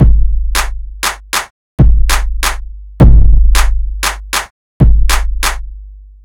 Grime Instrumental Intro
Only 6 seconds but hopefully i can produce a full 3 minute grime instrumental
Instrumental, Grime, Rap